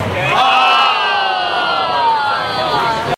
Crowd goes aww.